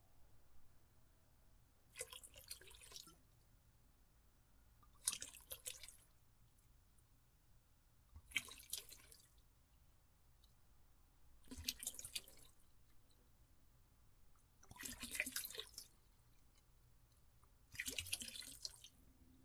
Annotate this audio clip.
glass bottle spilling liquid